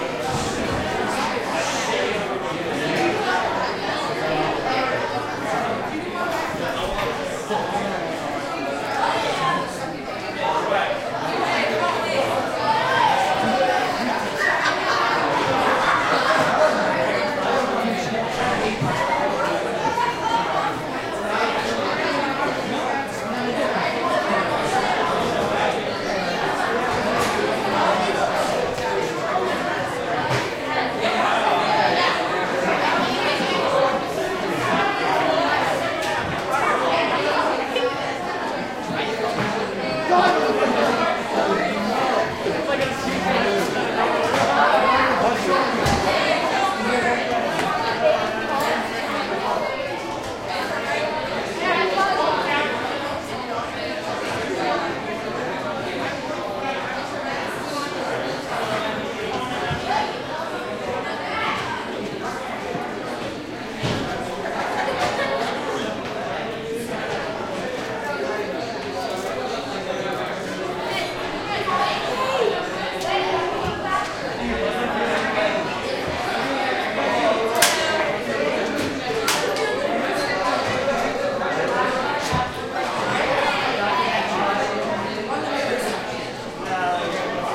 crowd int high school lounge busy students chatting hanging out2 Montreal, Canada
lounge, chatting, Canada, busy, crowd, school, students, high, int